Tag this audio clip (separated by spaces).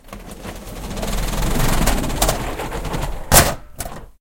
open opening shutter